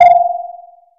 Techno/industrial drum sample, created with psindustrializer (physical modeling drum synth) in 2003.
drum, synthetic, industrial, metal, percussion